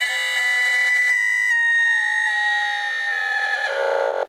Tech Gear Sound Thin
This is an 8 bit sample.
technology, bit